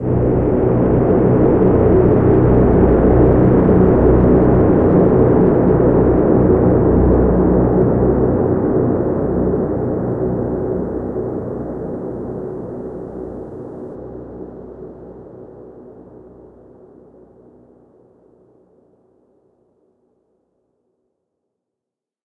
Big Noise Drone
it started with a little breeze.........
dark, drone, big-noise, space